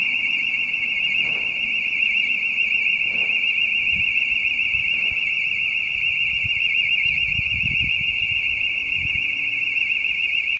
A short sound of a house alarm going of in a street. There is little interference in this recording- just a faint wind noise.
alarm, alert, annoying, emergency, field-recording, siren